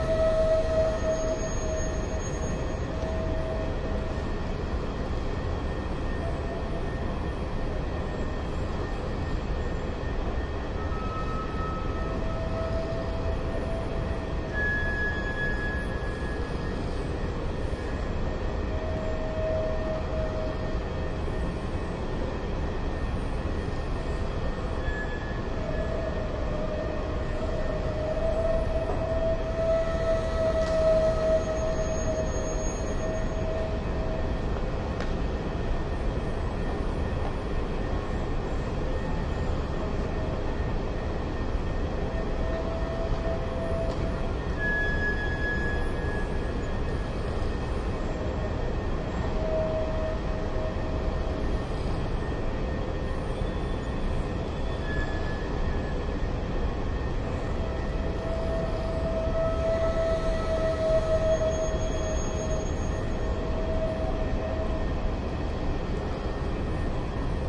Berlin Hauptbahnhof - Night Ambience (Quiet)
Loved the ambience of the station as I passed through there at midnight. There's someone asleep and snoring in the background.